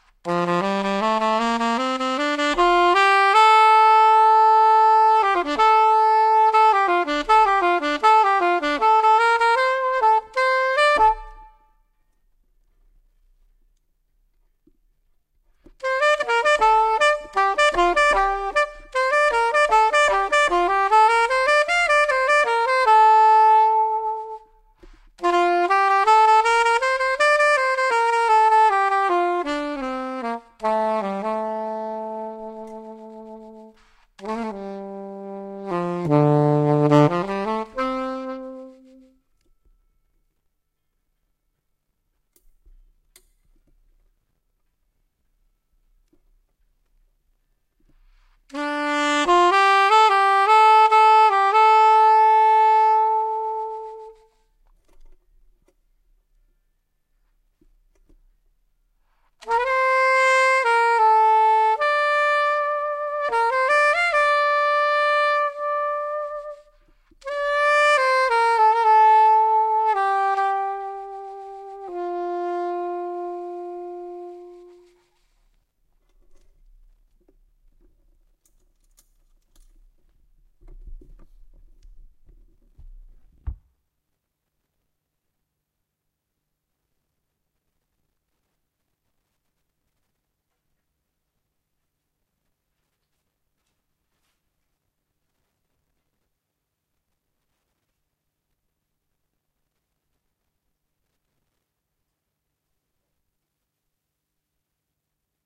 alto saxophone solo
alto, instrument, reed, saxophone